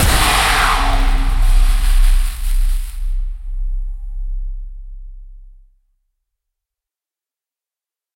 Spell explosion 2
Heavily relying on granular synthesis and convolution
impact, wizard, explosion